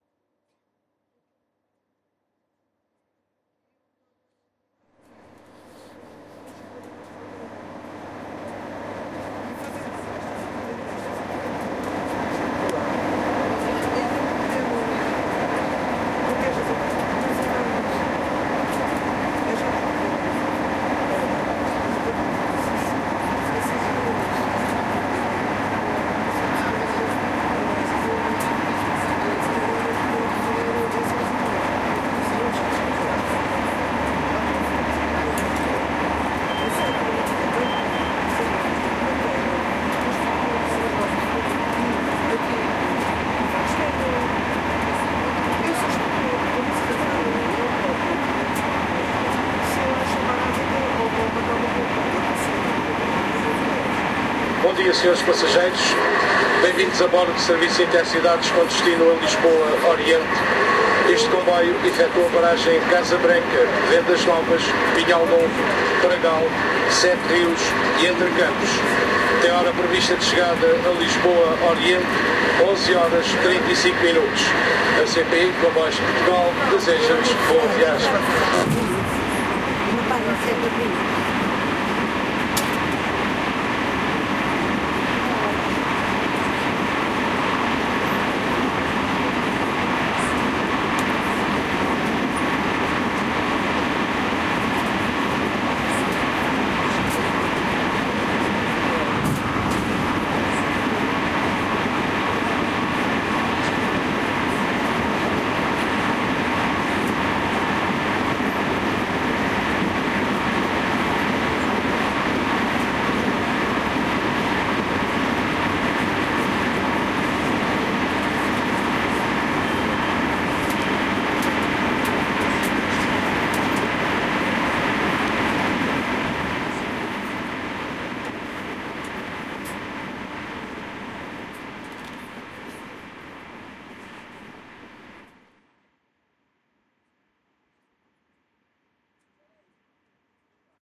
a train trip from évora to lisboa